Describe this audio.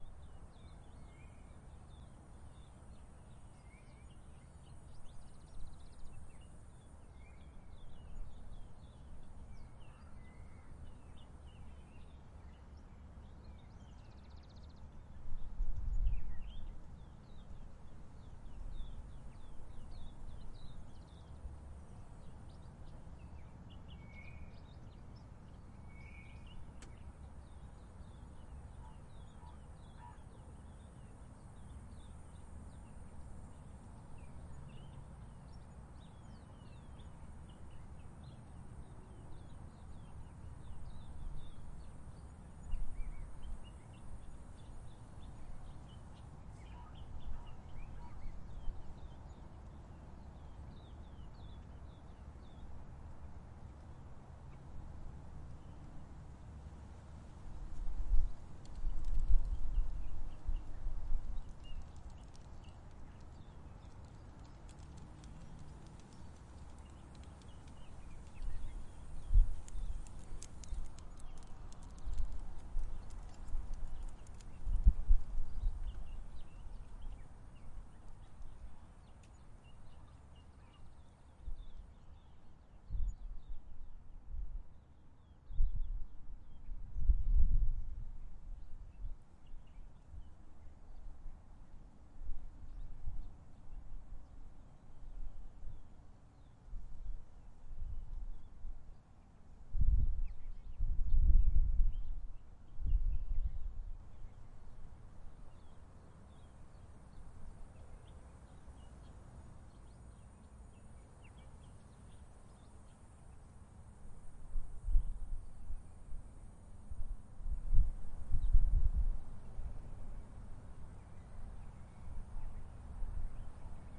Recorded with a Zoom H6. Recorded in a park.